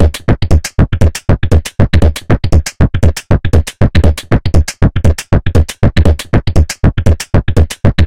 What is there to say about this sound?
Drum Loop 3 - 119 Bpm
119, loop, drum, 3, bpm